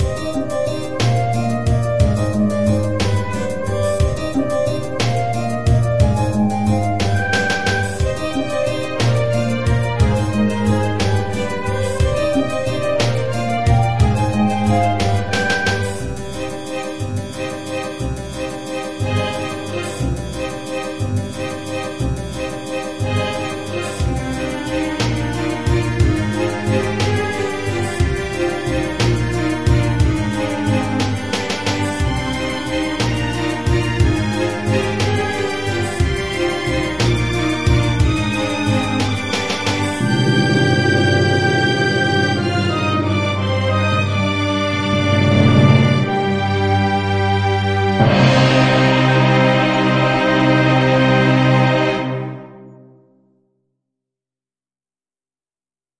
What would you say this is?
Short track with classical elements in a rock pattern (Cubase & GPO)
classical, rock, rythem